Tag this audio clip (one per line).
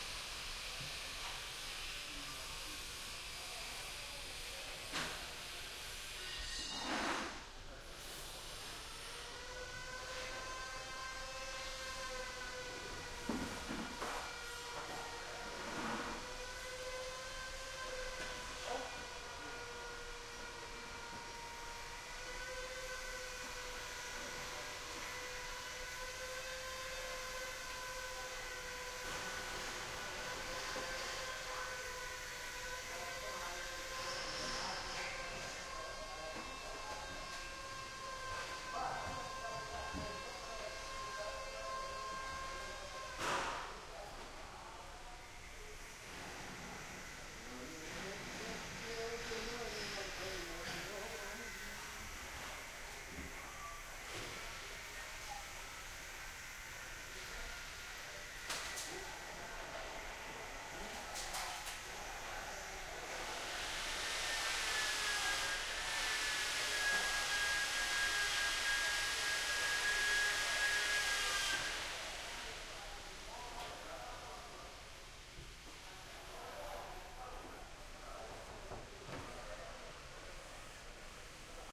grinder
metal